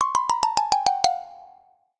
Xylophone for cartoon (15)
Edited in Wavelab.
Editado en Wavelab.
comic, dibujos, animados, cartoon, xylophone, xilofono